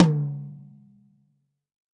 Toms and kicks recorded in stereo from a variety of kits.